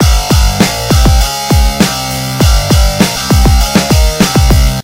fat guitar beat 2
Another 100 bpm guitar riff with beats
beats, hard, school